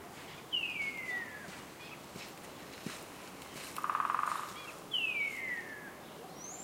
20061224.starling.woodpecker
two calls of a Starling with a woodpecker hammering in the middle
field-recording, nature